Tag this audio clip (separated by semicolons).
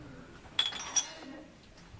bar; coffe; cups; shop; things